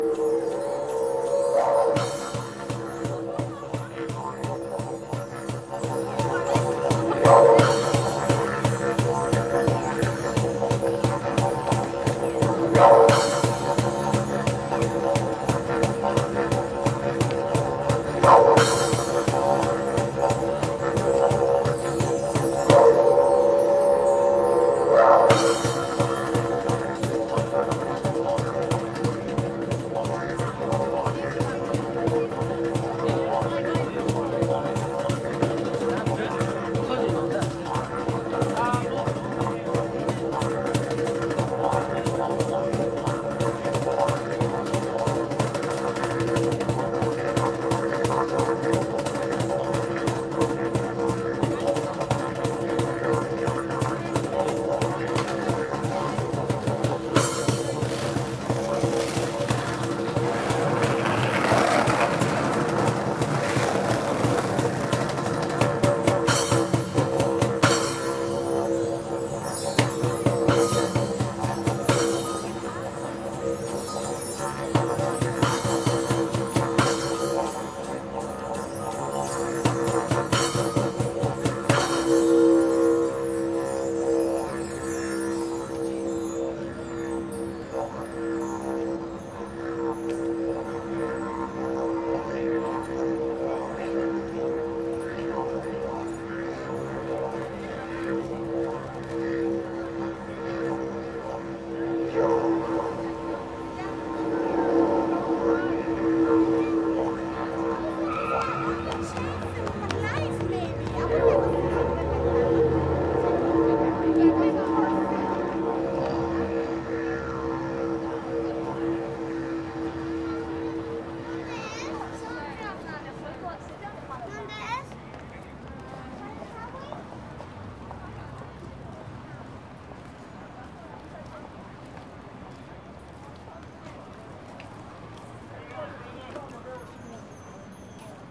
Awesome Didjeridoo Busker
recorded at Yonge and Bloor St
Toronto Ontario
didjeridoo, awesome, busker